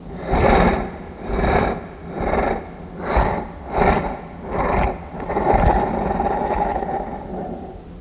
Soft Growling Creature Laugh
A soft, yet wicked creature laugh.